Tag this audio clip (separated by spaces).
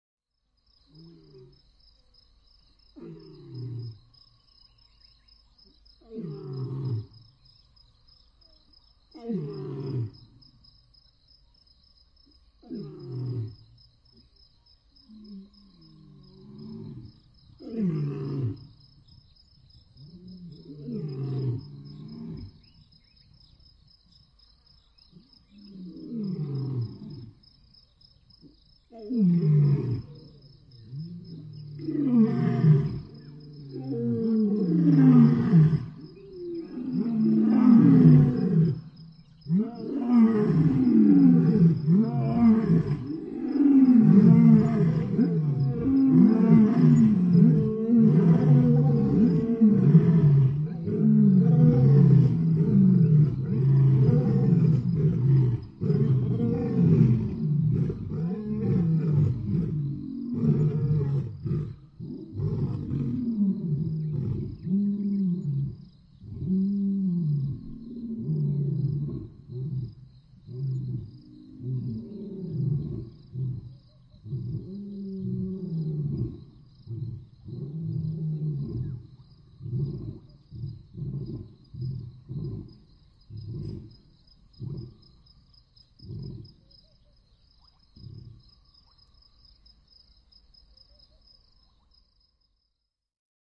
ambience south-africa nature field-recording lions lion ukutula roar africa